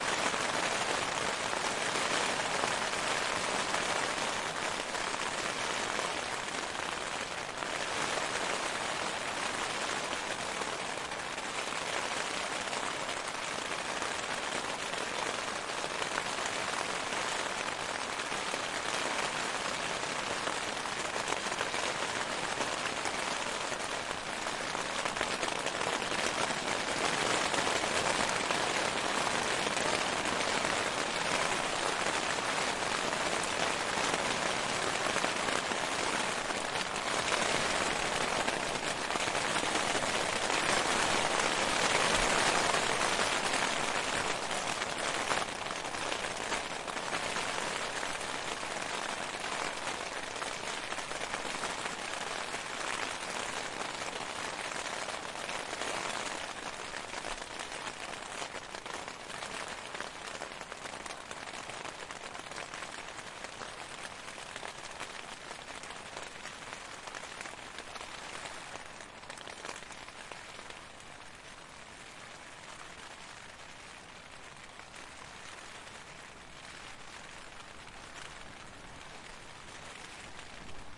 Recording of rain at night from inside a small tent with an R-26 stereo recorder.